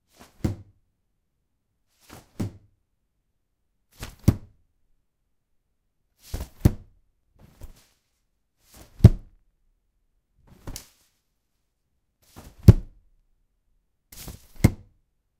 Opening and closing an umbrella. Recorded with a Zoom H2
closing, opening, protection, tent, umbrella, open, close